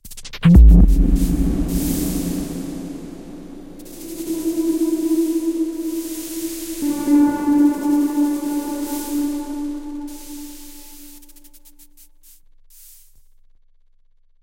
space impact
an impact sound, made using NI Massive
sound, future, effect, impact, sfx